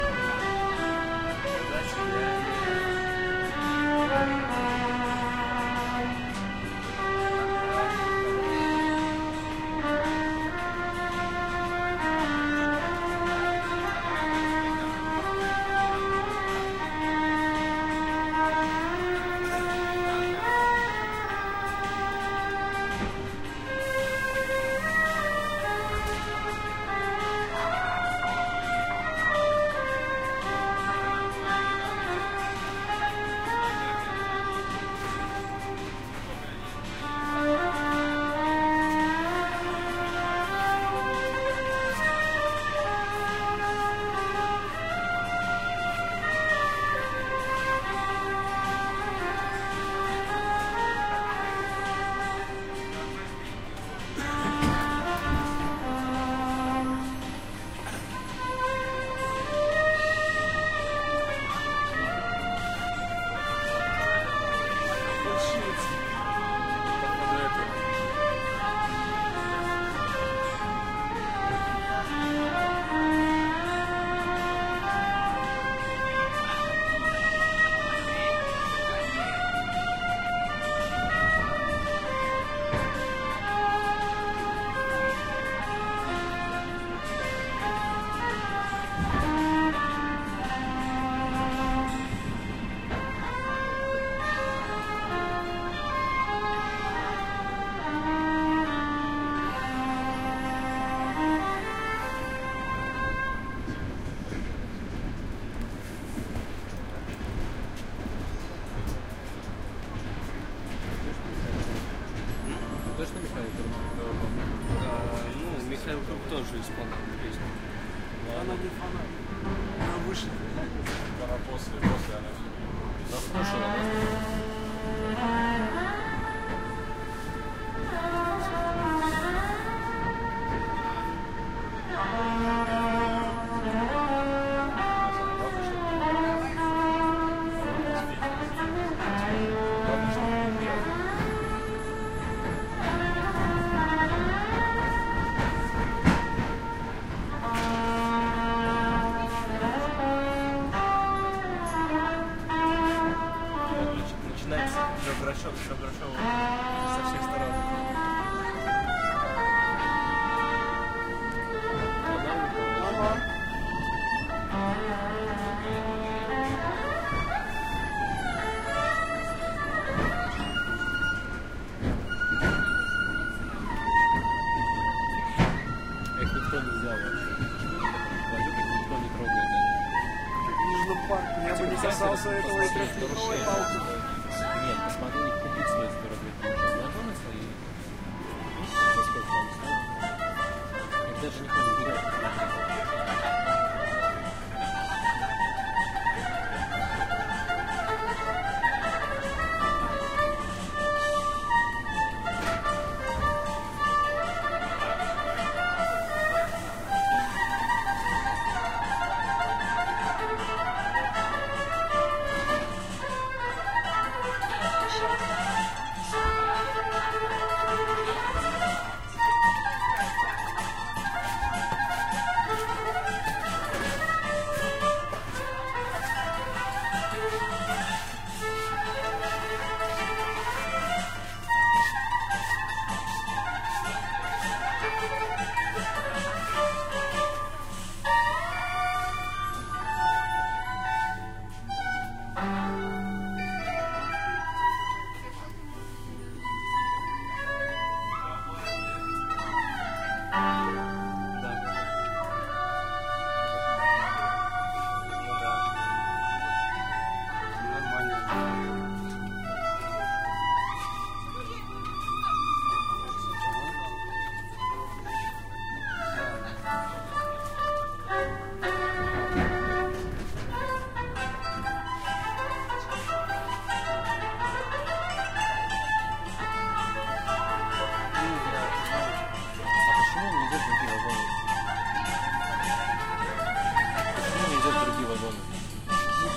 Violin player in suburban train Moscow - Petushki, XY mics
Street musician plays violin in a wagon of riding suburban train on Moscow-Petushki route.
Recorded with Roland R-26's internal mics.
Moscow, Petushki, Russia, Russian, ambience, crowd, field-recording, musician, railway, ride, street-music, street-musician, suburban-train, train, train-ride, violin, wagon